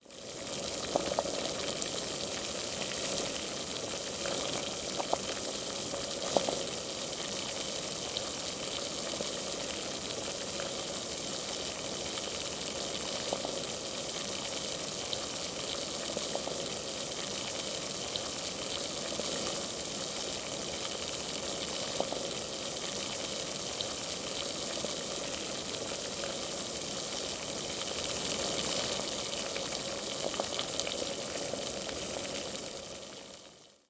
37 - 17 Boiling water
Sound of boiling water - long